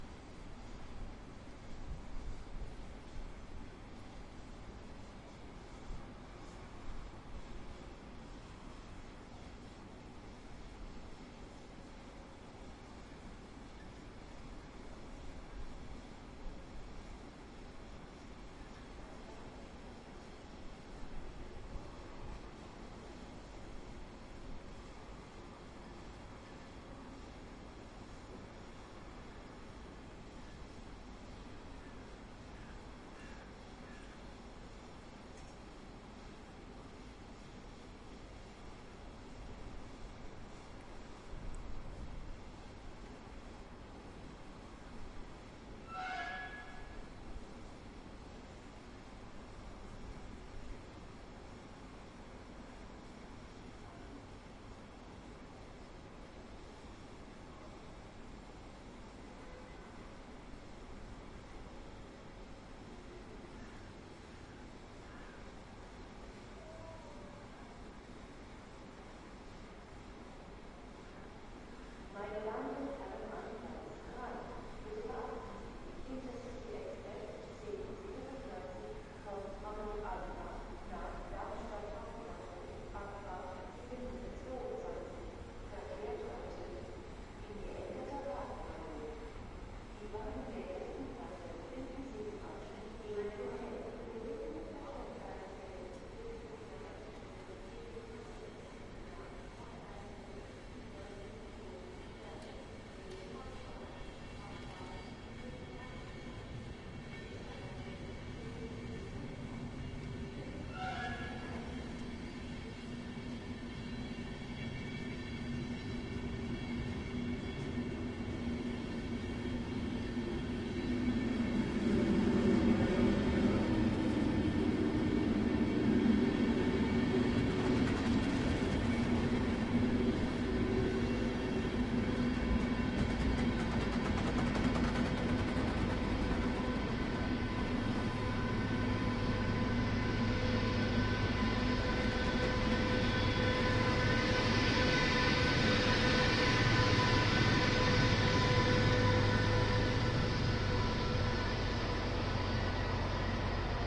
First of three recordings done on a cold day in January 2009. A train arrives on Hanover railwaystation. This recording was done with a Zoom H2 recorder. The most unusual feature of the H2 is its triple quadruple mic capsule, which enables various types of surround recordings, including a matrixed format that stores 360° information into four tracks for later extraction into 5.1. This is the front microphone track. With a tool it is possible to convert the H2 quad recordings into six channels, according to 5.1 SMPTE/ITU standard.